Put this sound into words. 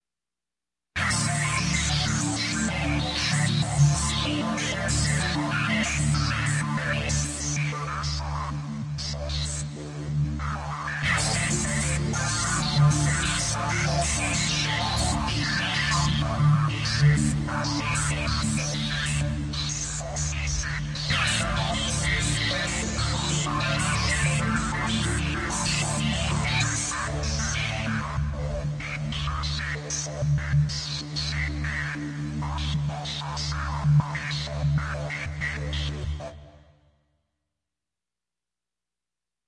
D63 Filter Dance Dm
podcast, gr-33, experimental, guitar, synth, fun, roland, intro